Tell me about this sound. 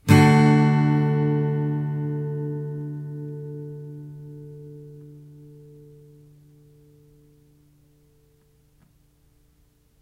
Yamaha acoustic through USB microphone to laptop. Chords strummed with a metal pick. File name indicates chord.
guitar, acoustic, strummed, chord